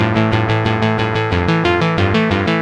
Ok, I am trying to make an epic 91 bpm neo classical instrumental and needed galloping synth triplets. This is what I got. Some were made with careless mistakes like the swing function turned up on the drum machine and the tempo was set to 89 on a few of the synth loops. This should result in a slight humanization and organic flavor.
loop, synth, 91, bpm